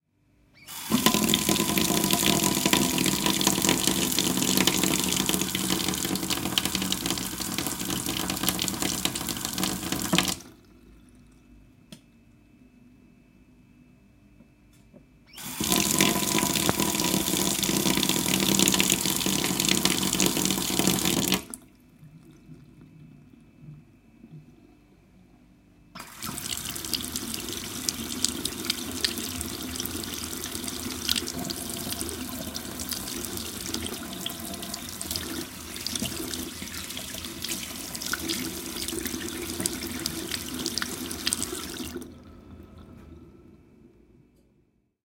Kitchen Tap Running water
Water running from a kitchen tap
kitchen, water